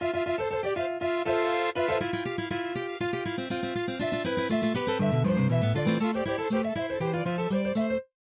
Vlads Day Out
A strange song I made when I was sick. It has a variety of instruments.